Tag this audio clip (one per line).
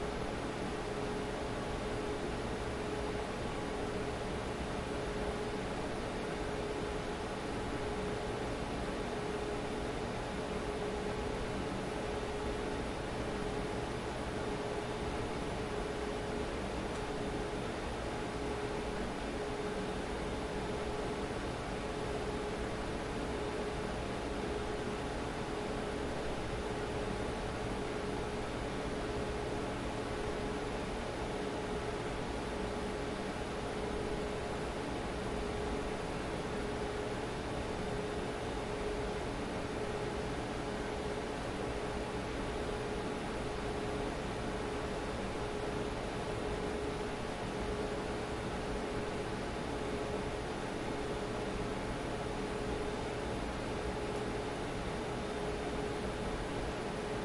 Machine
RoomTone
Data
Computer
Room